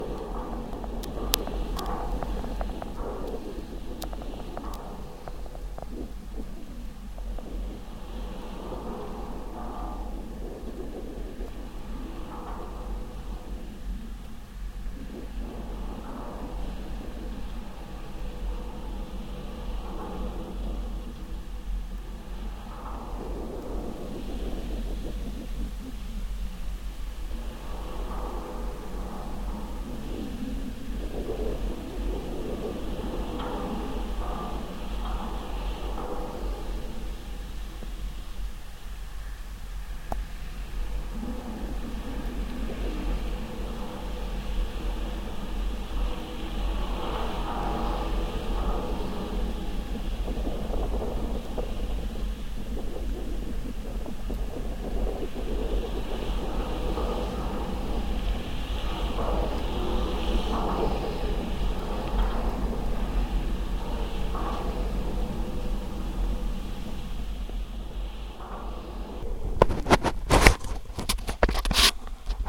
GGB suspender SE08SW
Contact mic recording of the Golden Gate Bridge in San Francisco, CA, USA at southeast suspender cluster #8. Recorded December 18, 2008 using a Sony PCM-D50 recorder with hand-held Fishman V100 piezo pickup and violin bridge.
bridge, cable, contact, contact-microphone, field-recording, Fishman, Golden-Gate-Bridge, piezo, sample, sony-pcm-d50